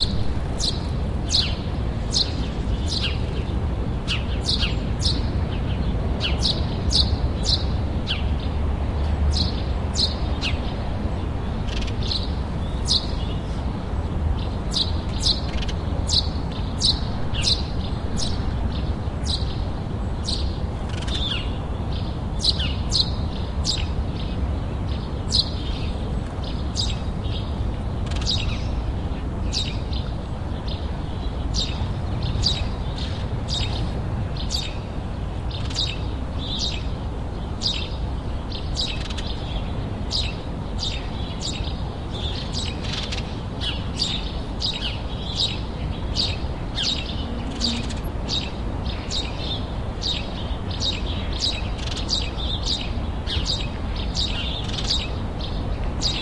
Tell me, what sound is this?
birds early morning 4
Birdsong early morning. Spring. Hum of city. Chirping sparrow.
Recorded 20-04-2013.
XY-stereo, Tascam DR-40. deadcat
morning, town, tweet, city, spring, sparrow, birds, riddle, chirping-sparrow, early-morning, birdsong, hum, rumble